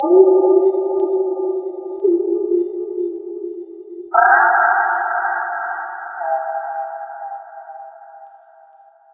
HF Rmx danielc0307 yankeebravo
mellow, remix